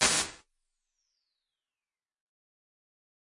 Alesis Microverb IR Gate 2
Impulse response of a 1986 Alesis Microverb on the Gate 2 setting.